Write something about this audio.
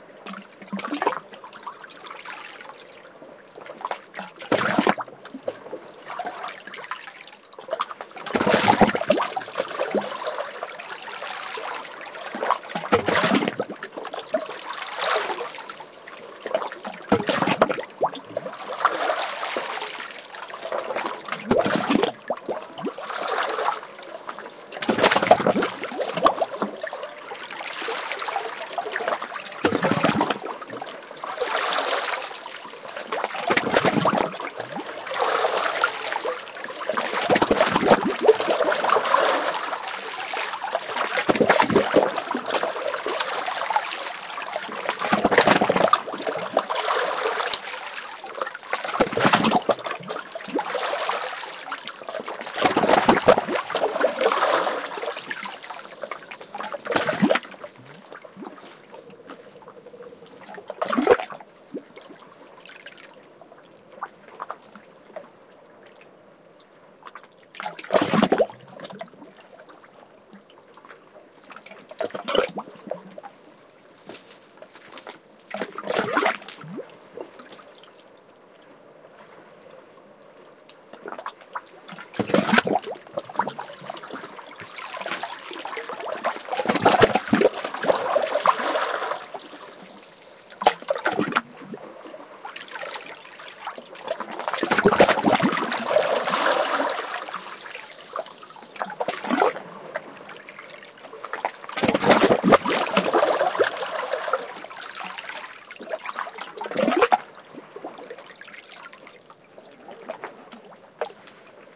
20150718 fjord.inlet.loud
Sea waves splashing inside a calm rocky inlet near Nusfjord, Lofoten, Norway. Recording this was 'tricky', to say the least. Primo EM172 capsules inside widscreens, FEL Microphone Amplifier BMA2, PCM-M10 recorder